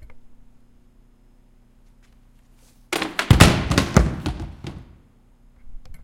Two chairs thrown, fall down and bounce around on the ground.